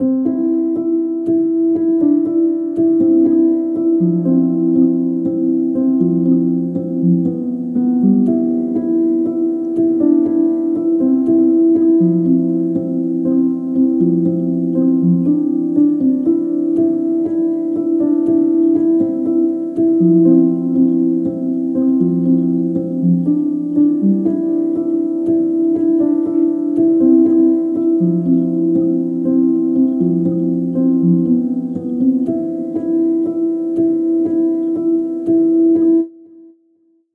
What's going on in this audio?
piano background 1
Just some quick piano background for the videos, I originally used it to put on a video of some DIY stuff
Not so very proud of it, but I think that will do if using in a simple projects.
That's why I'm giving it away for free. :) hope that helps!
background, chill, movie, muted, natural-reverb, peaceful, piano, silent, sustain, video